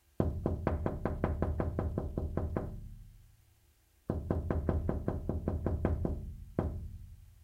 knocking on window